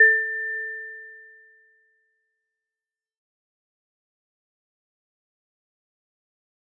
Sonido de un xilófono sintetizado, basado en: